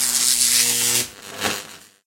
An electric zap sound created by layering these three sounds together:
Used for a production of Willy Wonka Junior, when Mike "sends himself" into the TV
electrical, sparks